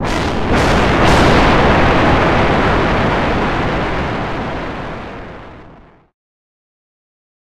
Tri-Thunder Pile-Up 1 (0% Reverb)
Thunderclaps of three on top of each other. It's ominous, but the effect is arguably lessened by the complete lack of reverb. And it's not actual thunder, either.